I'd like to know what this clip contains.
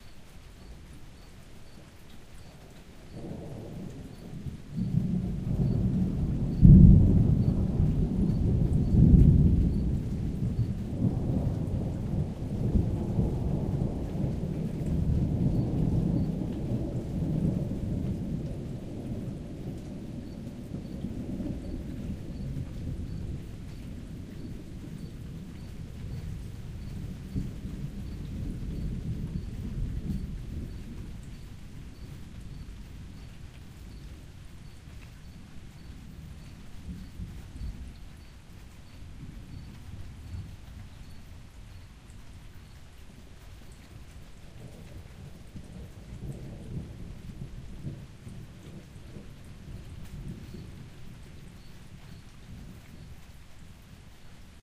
AMBIENT LOOP - Perfect Spectacular Hi-Quality Rain + Thunderstorm -SHORT LOOP
A shorter seamless loop of rolling thunder, with rain sprinkling down in the backround. Very high quality audio, recorded with a H4 Handy Recorder.